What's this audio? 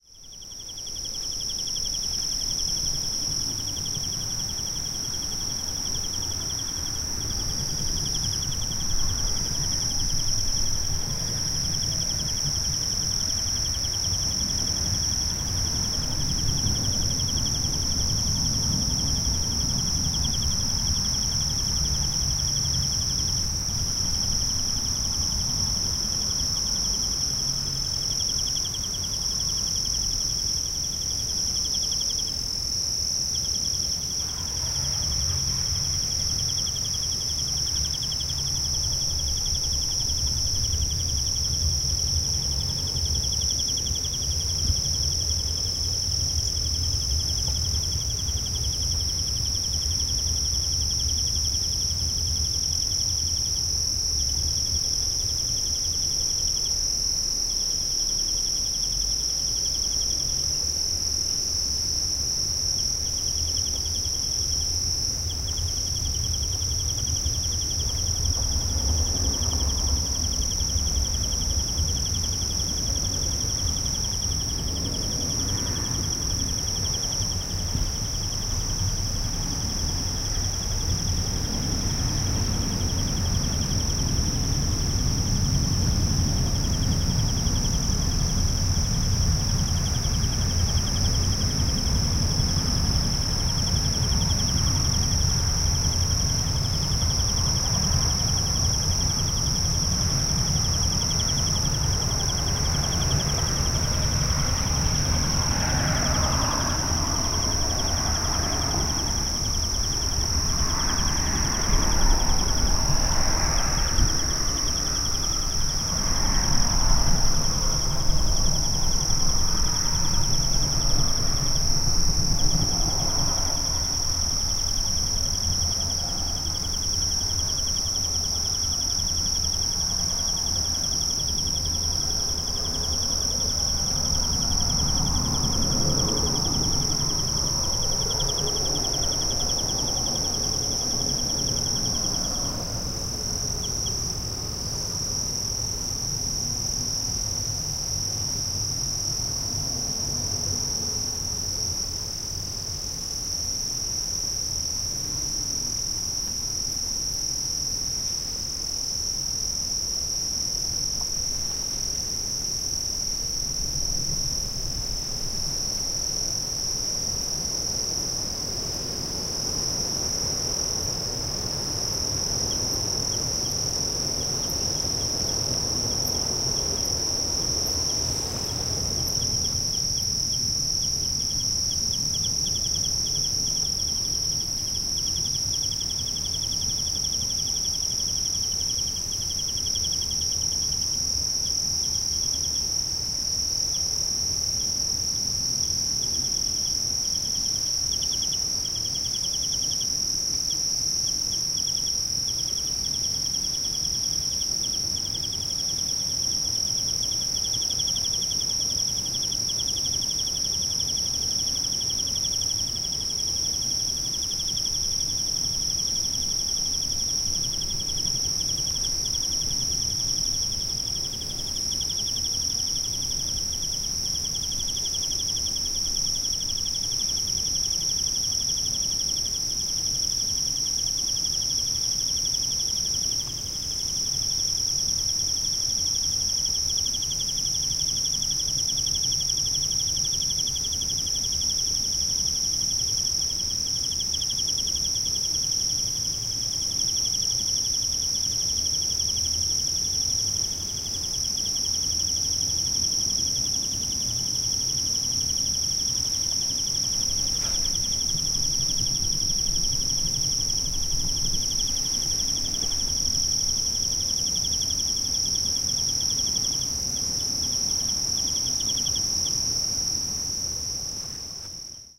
Fraser Range Salt Lake Eve
Recorded at a roadside camp at on a salt lake in the Fraser Range, Dundas Nature Reserve. Unfortunately it was very difficult to get a nice recording clear off human noise. In the end i just had to die to the fact that i was recording near the Highway.
atmos, australia, australian, crickets, eve, evening, field-recording, insects, nature, night